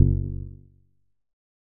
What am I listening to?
Percussive Hit 02 09
This sound is part of a series and was originally a recorded finger snap.
percussion, bounce, button, drum, percussive, percussive-hit, filtered, error